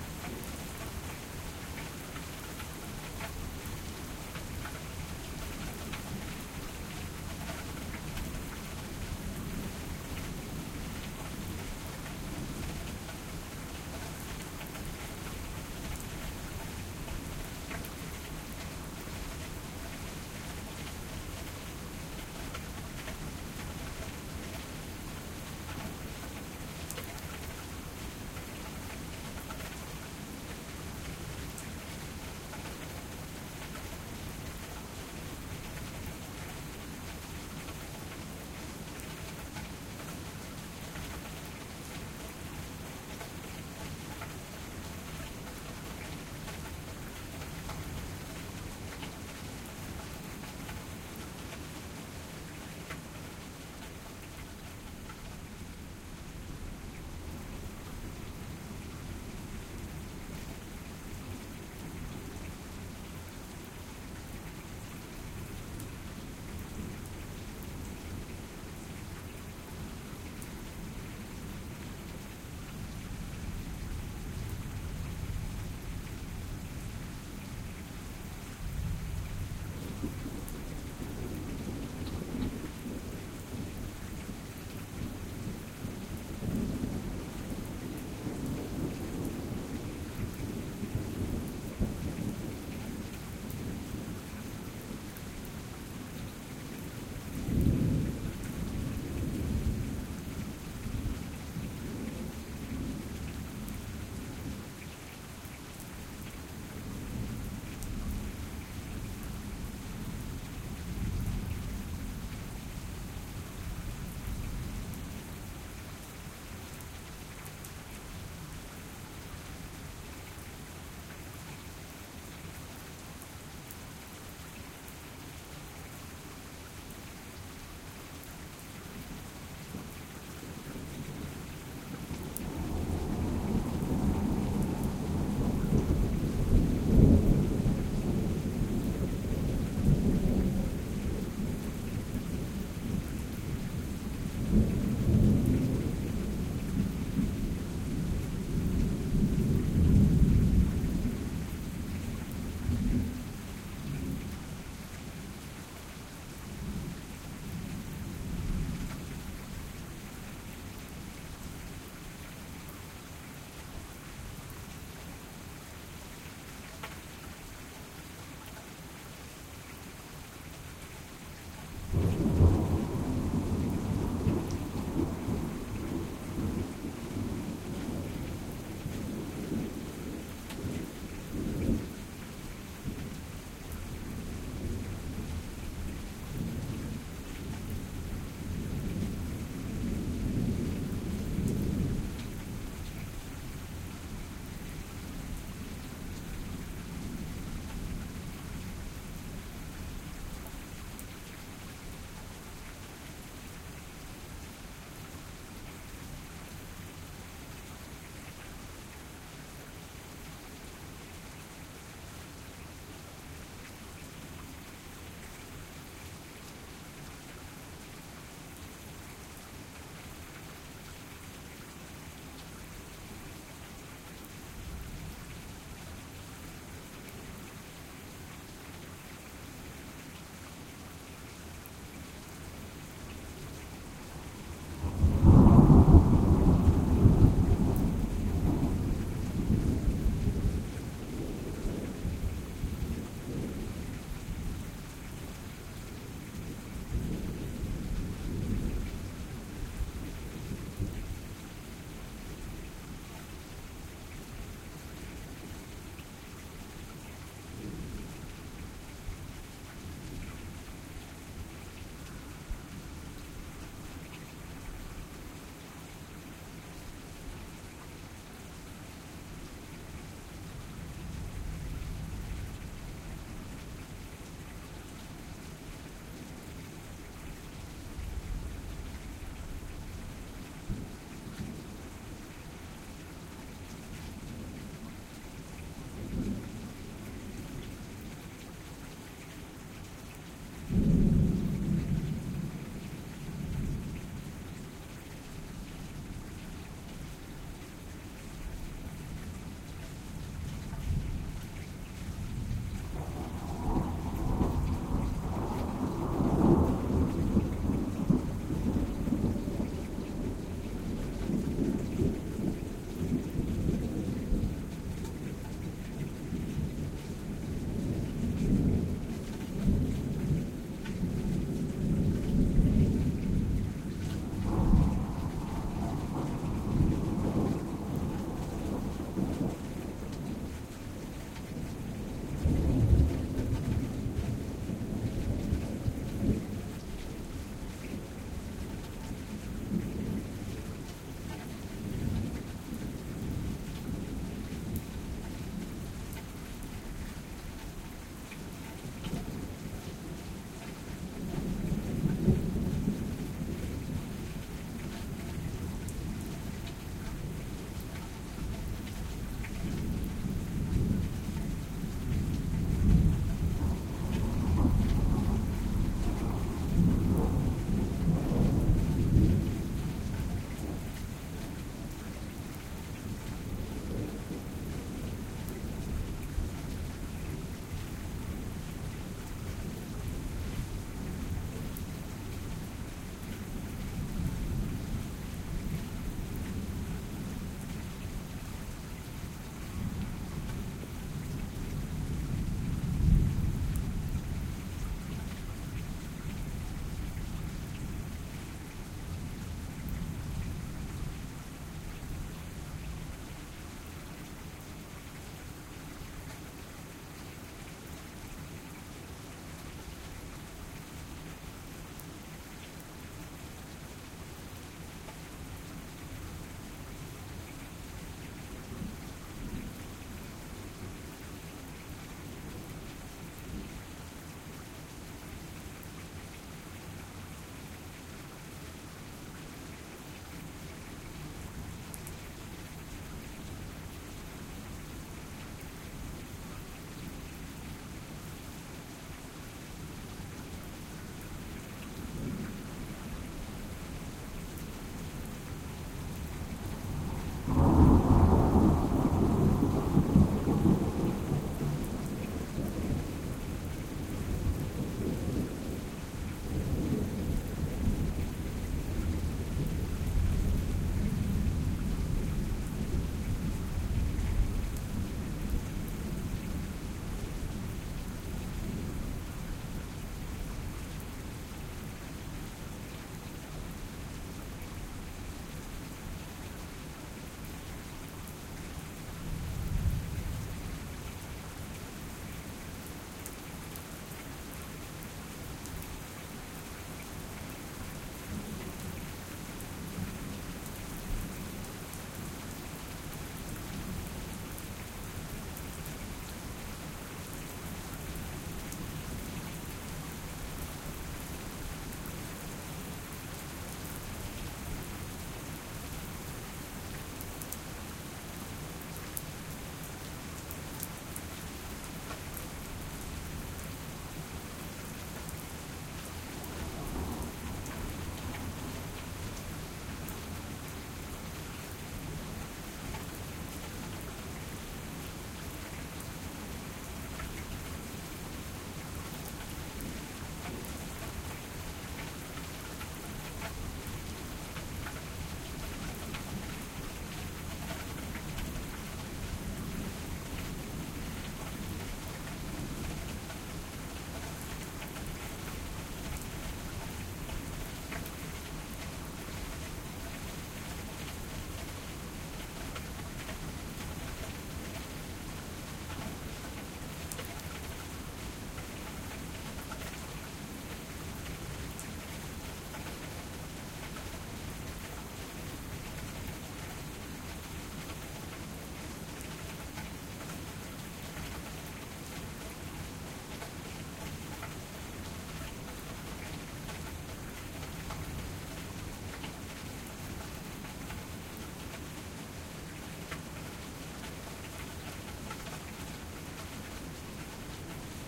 This spring rainstorm was recorded spring 2012 on a Sony PCM D50 outside my apartment in Rome, GA.
ambient, field-recording, loop, rainstorm